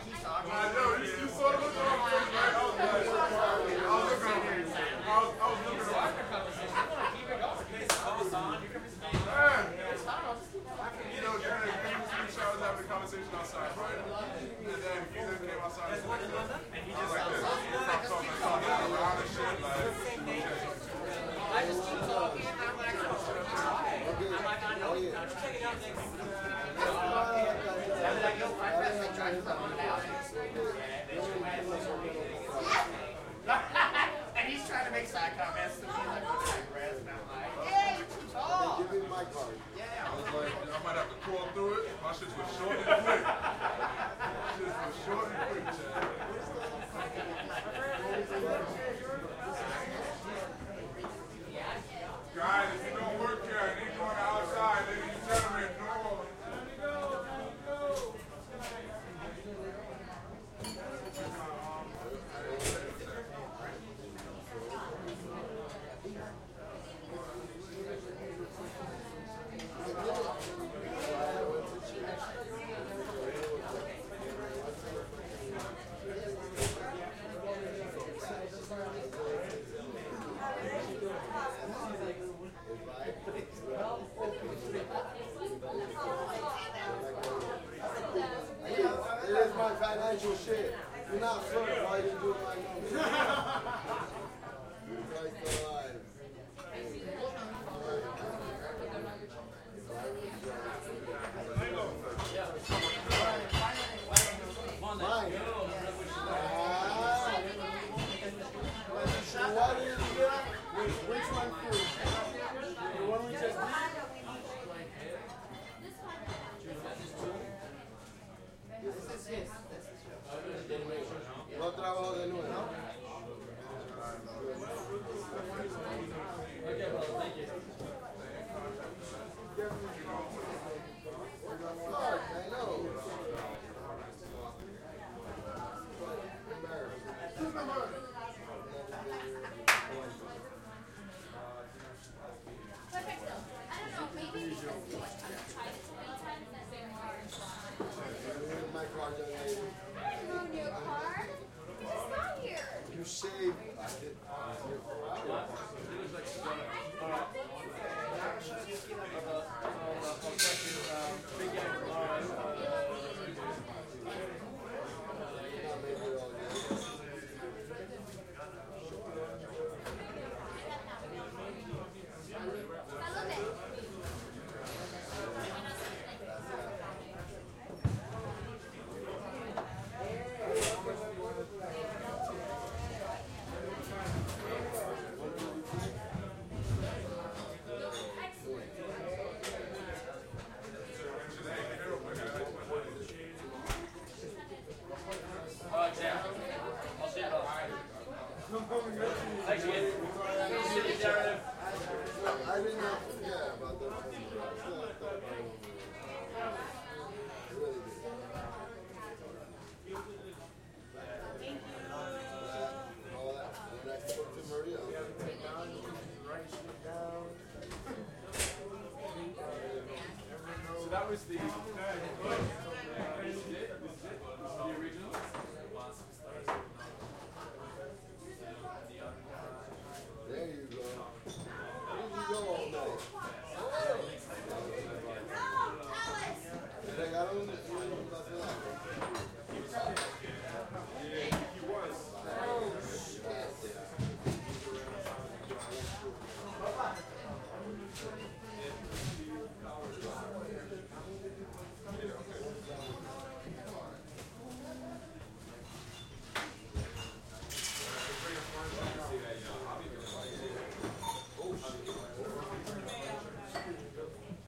Bar restaurant closing, chatter, glasses, walla, medium adult murmur
New York City nightclub after closing at 4am, crowd walla, bouncers, kitchen staff
glasses, medium, closing, chatter, walla, Bar, murmur, talking, crowd, people, restaurant, adult